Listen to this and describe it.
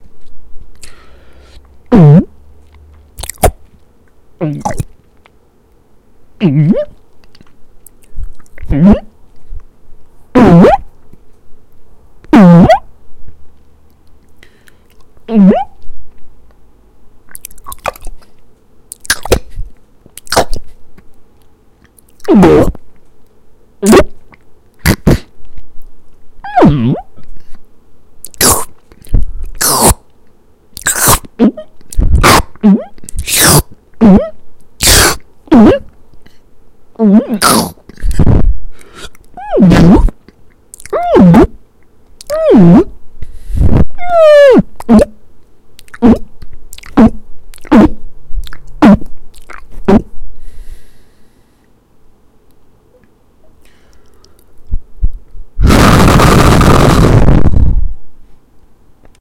Dog Sounds Gulp Chew Swallow
This sound has many different dog type swallows chews and gulping. I was trying to this for my mobile app game. Listen to it you may find what you need. The quality is ok and some are really bad. Good luck!